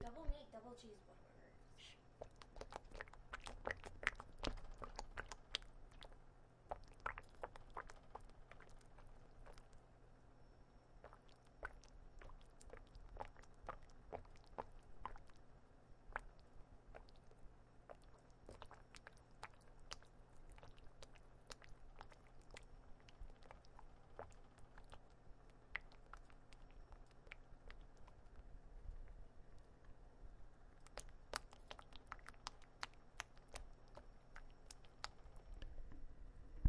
squishing sounds
Was cooking a ham steak one night and when poking it with a fork noticed it made some awesomely gross sounds, lol. So, like any astute audio lover, I grabbed my Zoom and recorded it! Did my best to not rattle the stove or anything on it.
But I'm always interested to see how the sound are used - especially this one ;)
Raw, unedited file. Enjoy!
sound-effects, wet, splash, raw, FX, Zoom, squish, water, drip, gurgle, moist, ham, fap, H1, stove, file, liquid